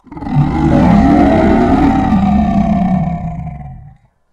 the sound of an animal growling